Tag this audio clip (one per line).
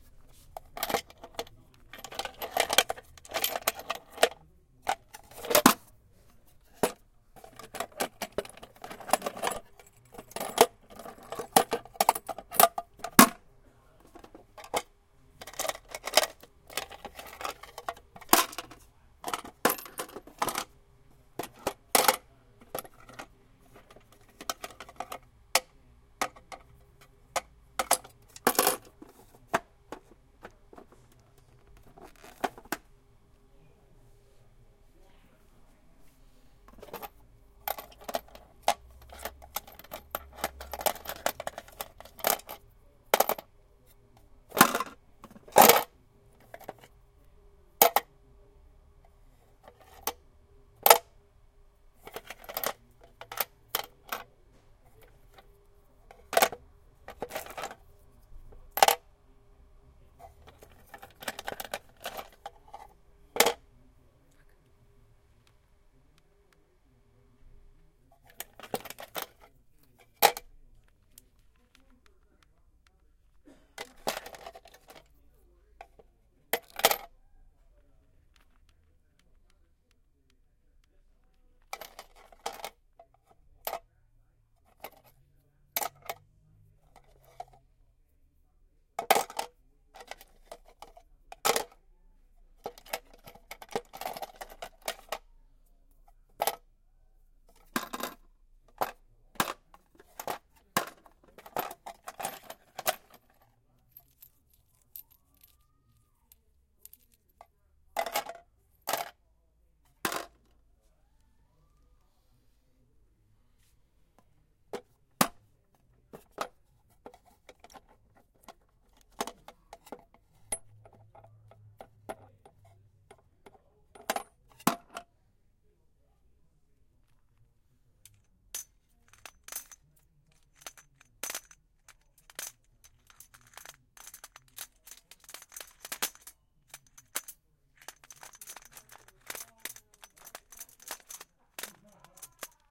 hardware
hardware-store
heavy
hit
many-of-the-same-things
metal
plastic
steel
tumble